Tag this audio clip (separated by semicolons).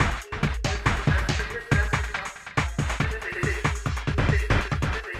extreme; glitch; processed